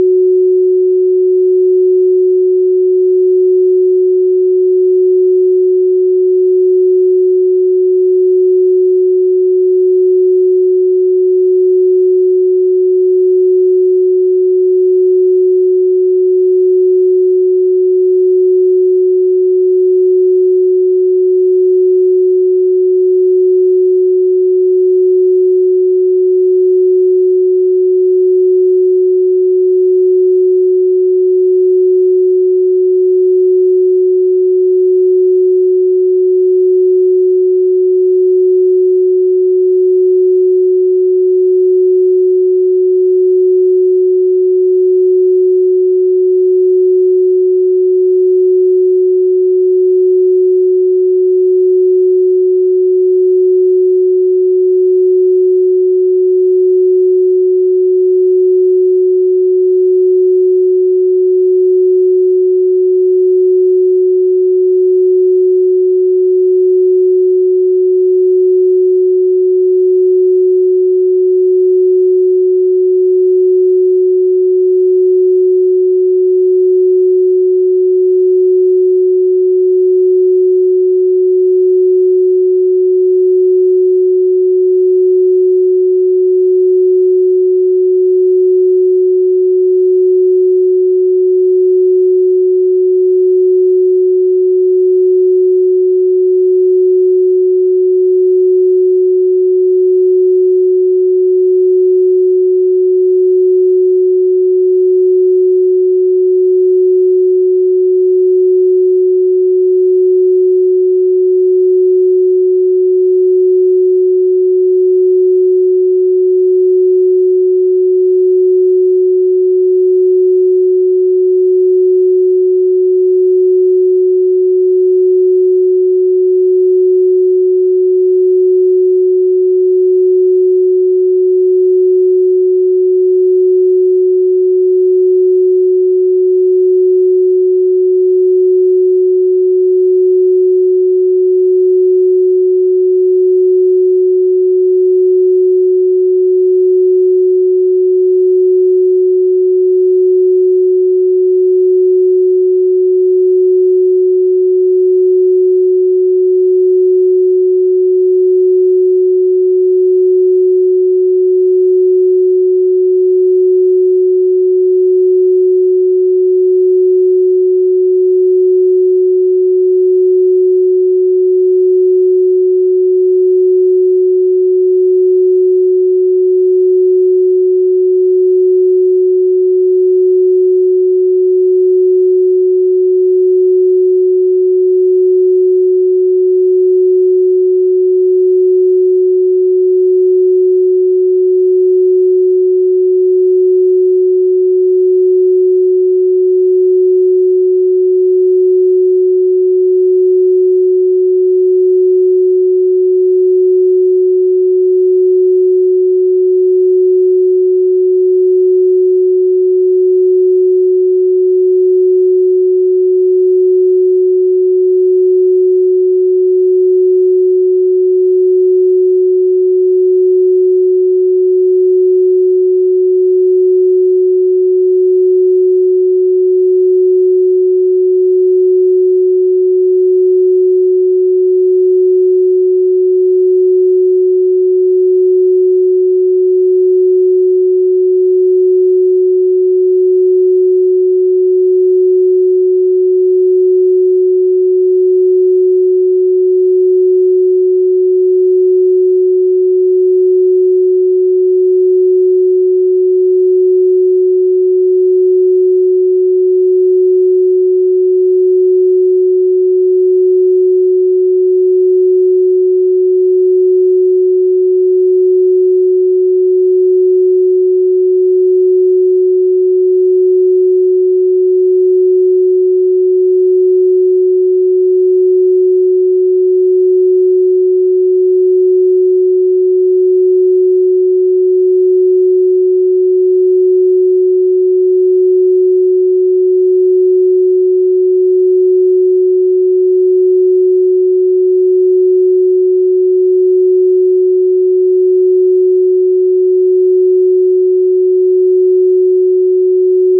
369Hz Solfeggio Frequency - Pure Sine Wave - 3D Spin
May be someone will find it useful as part of their creative work :)
Pythagoras, hz, 3d, om, gregorian, chant, buddhist, spin, tone, ring-tone, frequency, solfeggio, tibetan, tibet, aum, yogic